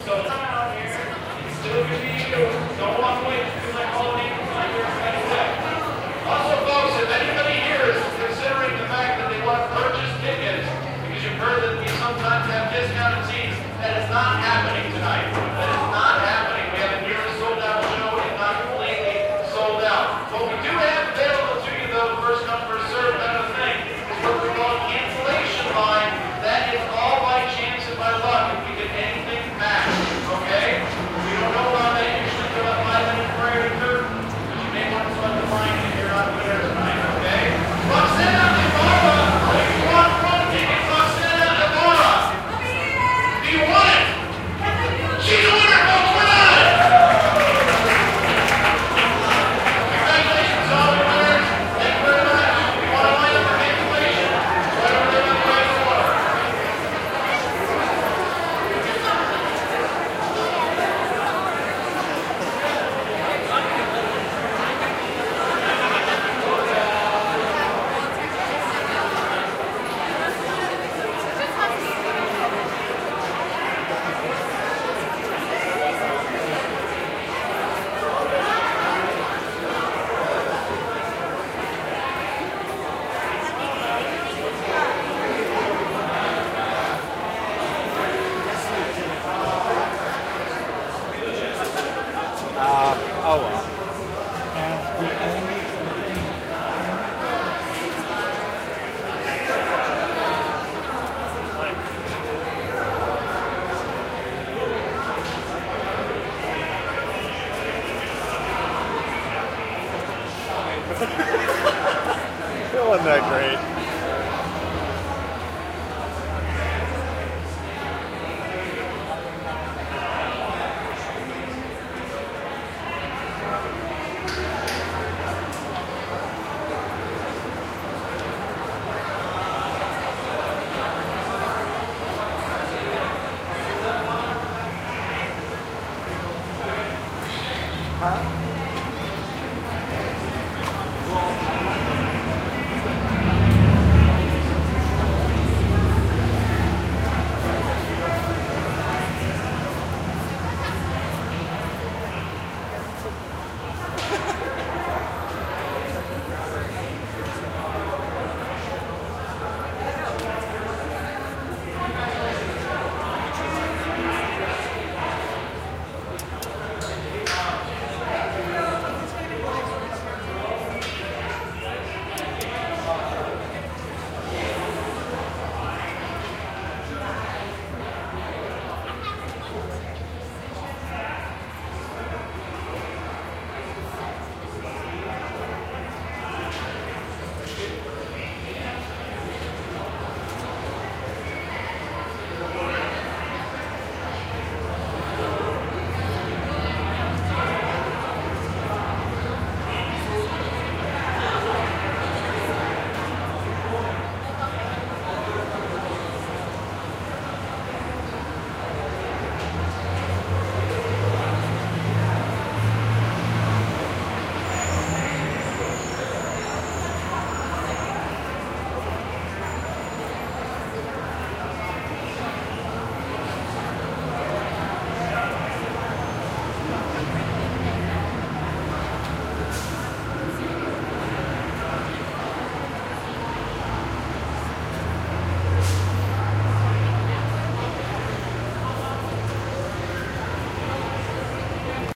This is 12-22-07, outside The Pantages Theatre, Hollywood, CA.
ambiance, ambling, cheering, crowd, loud, people, street, theatre